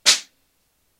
Snare Drum sample with 2012-MacbookPro
Snare Drum sample, recorded with a 2012 MacbookPro. Note that some of the samples are time shifted or contains the tail of a cymbal event.
2012-MacbookPro, dataset, drums, drumset, sample, snare, snare-drum